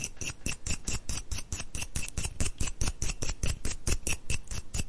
Reamer with Brush
Cleaning a bassoon reamer with a toothbrush.